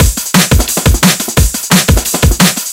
mother of all
2 bar drum and bass loop 175bpm
hat, 2